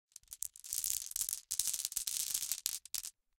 Glass marbles being poured back and forth from one hand to another. Grainy, glassy sound. Close miked with Rode NT-5s in X-Y configuration. Trimmed, DC removed, and normalized to -6 dB.